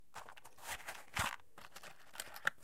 In this series of recordings I strike many Cook's safety matches, in a small plaster-boarded room. These sounds were recorded with a match pair of Rode M5 small diaphragm condenser microphones, into a Zoom H4N. These are the raw sound recording with not noise reduction, EQ, or compression. These sounds are 100% free for all uses.
burning, matchbox, strike